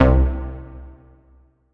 BASS RVB 2
reverb, bass